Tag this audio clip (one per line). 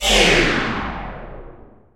reverb
blast
laser